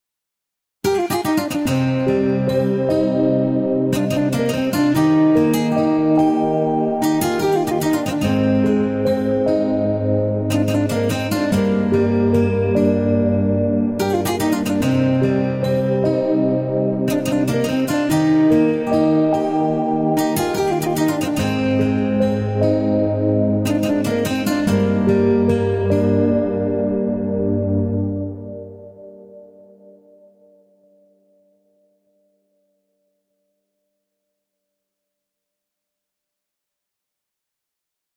Balkan guitars improvisation
I came up with this melody while testing my new korg kronos 2. This playing style is common in the Balkans.
acoustic, balkan, beautiful, chord, clean, ethnic, folk, guitar, improvisation, melancholic, melody, minor, notes, pad, sad, short, strum, theme, trills